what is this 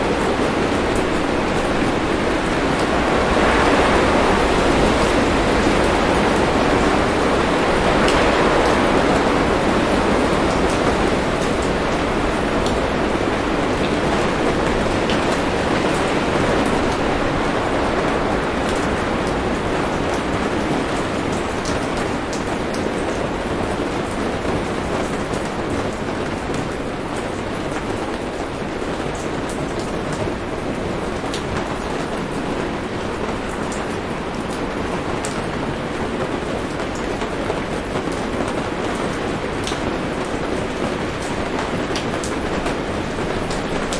Wind Gusts and Rain
Recorded during a wet winter storm on January 12, 2014 in Nova Scotia.
wind gusts were reported to be 100 km per hour with heavy rain. Recorded using a Zoom H4N using onboard mics standing under an awning for cover. Curious how this ends up being used.